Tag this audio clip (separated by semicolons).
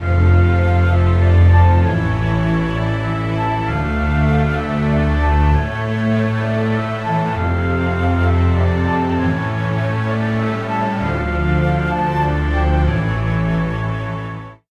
sad; fragment; flute; tragic; music; orchestra; motif; strings; loop